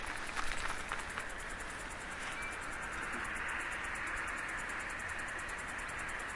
This sound was recorded by an Olympus WS-550M in the early morning on Sunday. It's the sound of a cicada in a small tree.